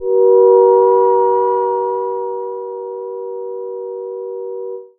minimoog vibrating A#4
Short Minimoog slowly vibrating pad
vibrato, synthetizer, minimoog, pad, short, electronic, short-pad, slowly-vibrating, synth, moog